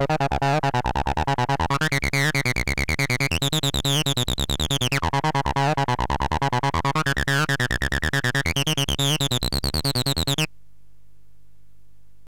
ACID 1..1wav
ACID resonance filter old skool tb303 future retro revolution house techno electro
acid, filter, future, house, old, resonance, retro, revolution, skool, tb303, techno